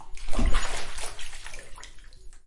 Bathtub Submerge
Object being submerged in a bathtub. Recorded on a Zoom H4N using the internal mics.
bathroom, bathtub, splash, submerge, water